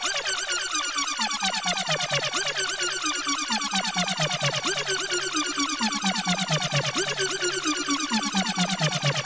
Synth Loop - Wobble Wars
Minibrute synth arpeggiator experiment snippet. Loops at approx 129.7 bpm.
130bpm, synth